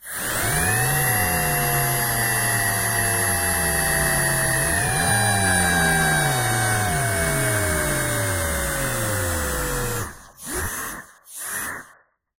HyperSpeed Charger Malfunction
It looks like the win will go to Danny Zucco, as I blew my HyperSpeed-Charger . ...Next time.
engine, fast, hyper, hyper-charger, hyperspace, hyperspeed, jet, loss, malfunction, off, propulsion, speed, super-charger, then, turbo-charger